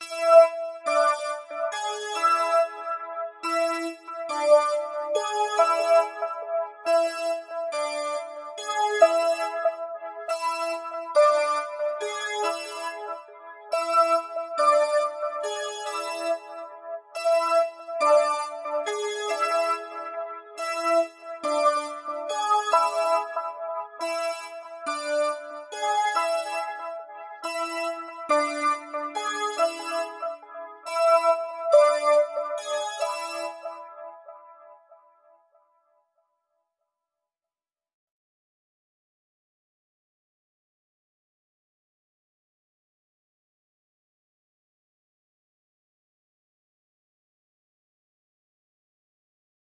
Creado probando un plugin de Vocoder en LMMS con el sinte Firebird y una pista de voz. Losd iferentes sonidos son resultado de cambiar la configuración del sonido del Firebird.